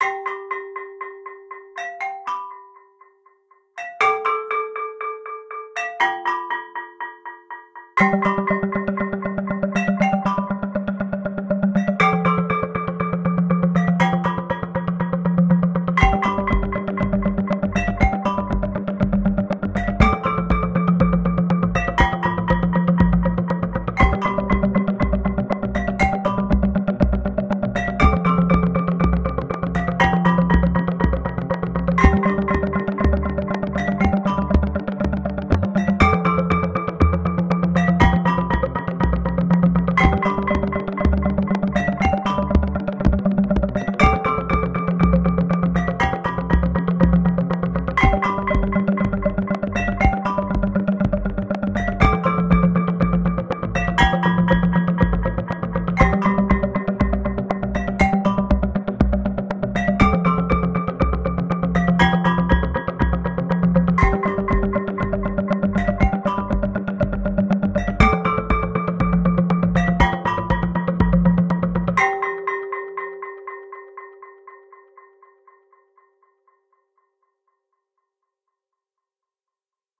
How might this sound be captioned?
bird on a fence song - 120bpm
A little bird started singing on a fence next to my window and I started imitating him with my Stones of Skiddaw midi player, added in an accompaniment.